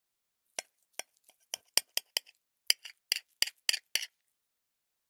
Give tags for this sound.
cutting; eat; eating

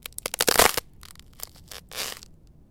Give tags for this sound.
crack; sheet; ice; foot; outdoor; winter; step; stress

